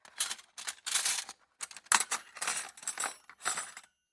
Cutlery Grabbing 01
Grabbing cutlery from drawer. Recorded using a Sennheiser MKH416 with a Sound Devices 552.